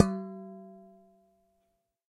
Percasserole rez A 1
household, percussion